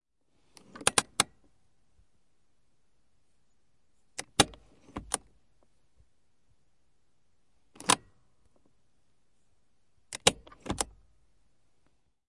CAR-HANDBRAKE, Volkswagen Golf GLE 1992 1.8 Automatic, handbrake, various speeds, engine off-0001
Part of Cars & other vehicles -pack, which includes sounds of common cars. Sounds of this pack are just recordings with no further processing. Recorded in 2014, mostly with H4n & Oktava MK012.